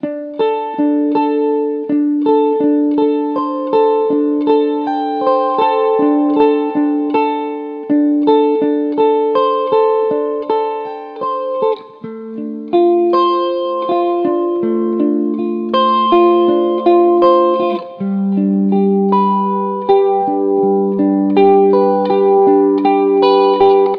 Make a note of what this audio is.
Indie rock riff played with Ibanez rga32-mol(metal guitar) :-). Please use it sample and make something good :-)
If you use this riff please write my name as a author of this sample. Thanks. 80bpm
YO!